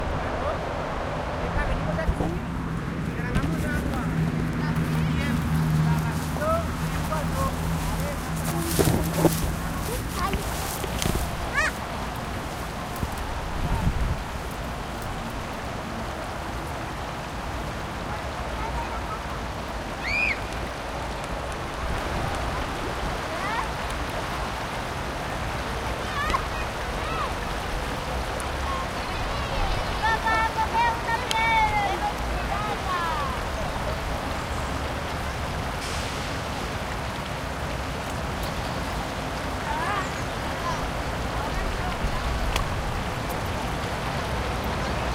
20211013 RiuBesòsCanZam Traffic Nature Humans Water Complex Chaotic
Urban Ambience Recording at Besòs River by the riverbank, opposite Can Zam, Barcelona, October 2021. Using a Zoom H-1 Recorder.
Traffic, Nature, Humans, Complex, Water, Chaotic